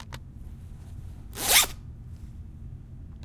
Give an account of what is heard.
Zip Up
Zipping up my zipper
up, zipper, zip